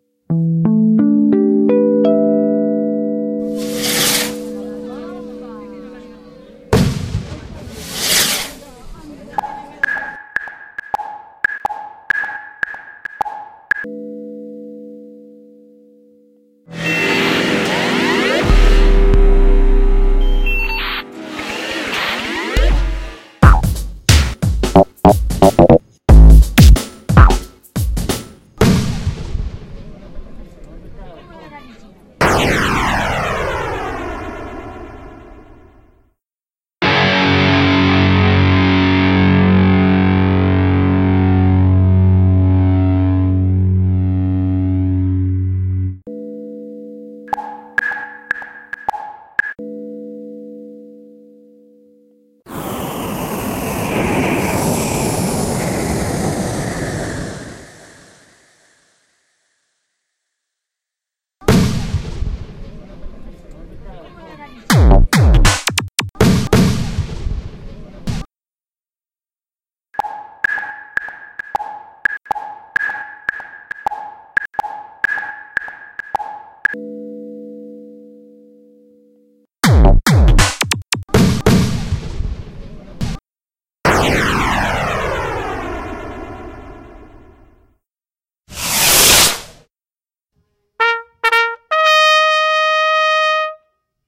a journey through space, alien encounter included.